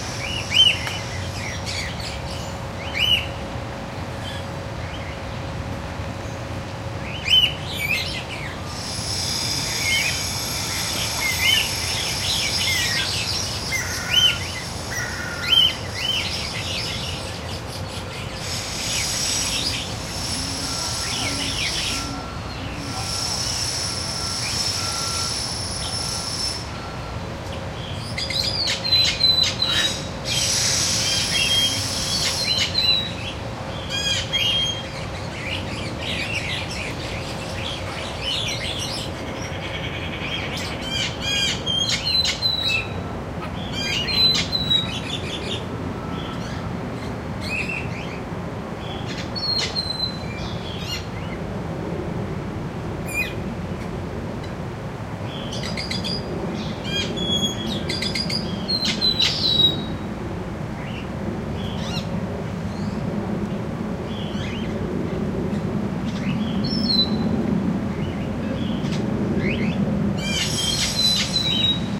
Recorded at the Dallas Zoo inside the Bird Landing aviary. Birds include Hawk-headed Parrot, Scissor-billed Starling, Cockatiel, Blue-bellied Roller and Guira Cuckoo. There is some close-up noise from construction, but it is mostly at the beginning of the recording.